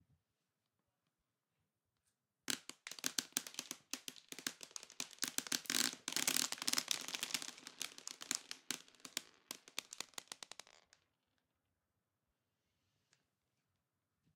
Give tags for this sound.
Landing
Bouncing

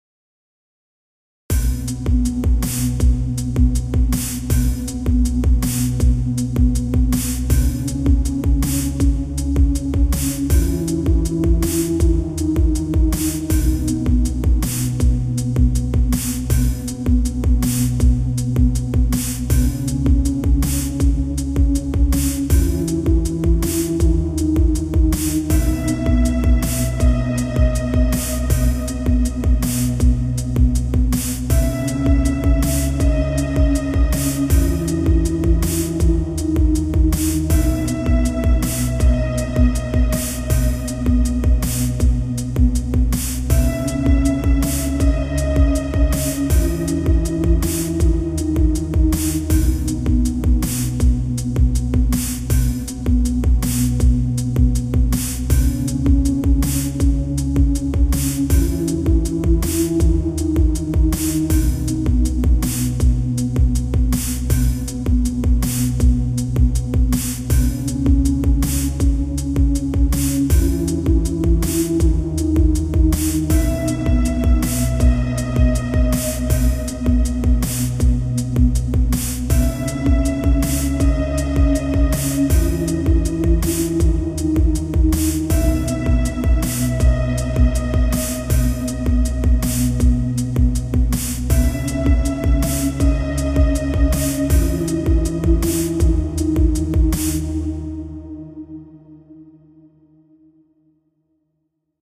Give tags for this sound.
uplifting
sad
nor
influence
Bmajor
beat
Experimental
hop
Hip
simple
Ambient

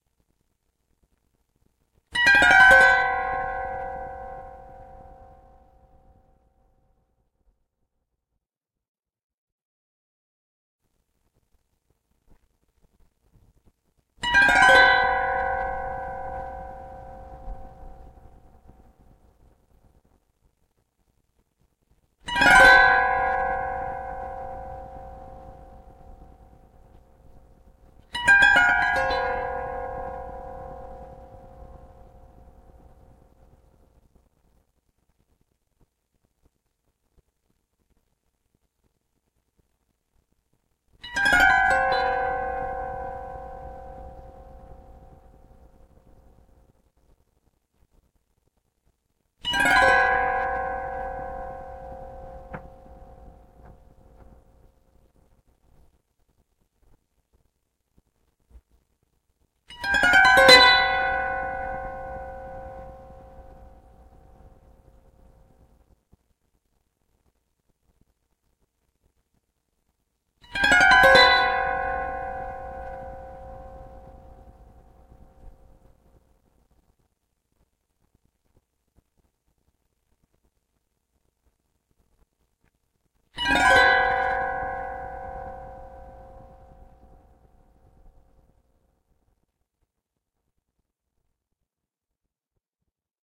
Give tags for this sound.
china
chinese
instrument
japan
japanese
string

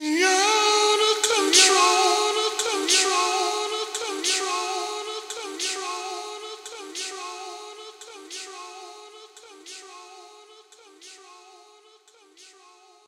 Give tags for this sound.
mikeb
outtacontrol
vocals

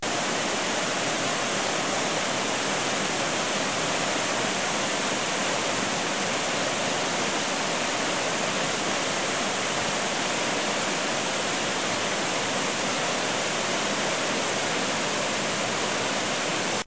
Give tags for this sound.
Waterfall
Field-Recording
river

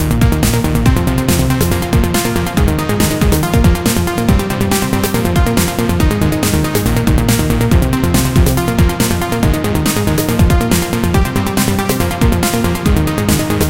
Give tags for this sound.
140-bpm
beat
drum
drum-loop
drums
loop
modern
space

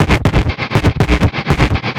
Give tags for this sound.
loop
120bpm
buzz
ground

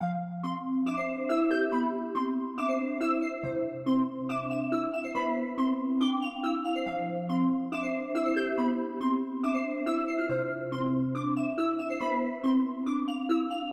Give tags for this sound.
electronic
melody
loop
melodic
electro
pixel
synth
chill
wave
music
70bpm

